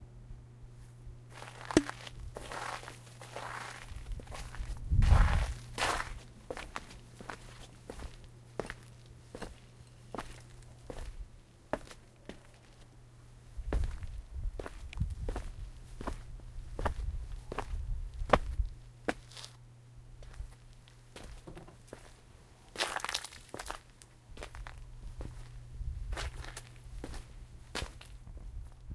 Footsteps I recorded outside. They are kinda noisy but useful.